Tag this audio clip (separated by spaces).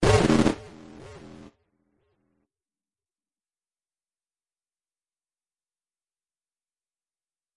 indiegame Sounds